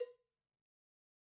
cowbell strike 01
LP Black Beauty cowbell recorded using a combination of direct and overhead mics. No processing has been done to the samples beyond mixing the mic sources.
dry, acoustic, cowbell, multi, instrument, real, velocity, stereo